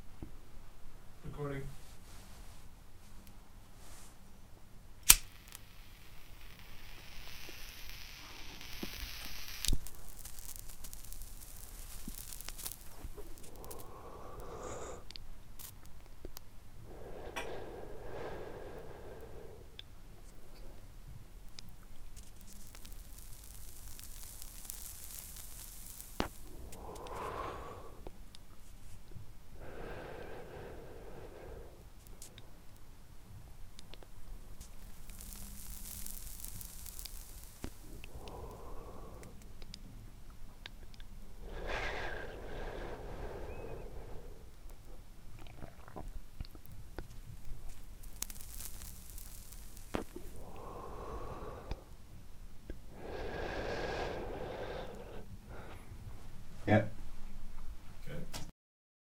Closeup sound of a guy lighting a cigarette. Recorded in studio with a sennheizer long gun microphone.